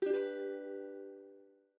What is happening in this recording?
Ukulele chord #3 - Acorde de ukelele #3
Ukulele chord recorded through a condenser mic and a tube pre.
string, ukulele, chord, button, acorde, ukelele